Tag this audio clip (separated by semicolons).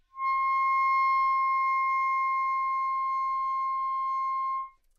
multisample; neumann-U87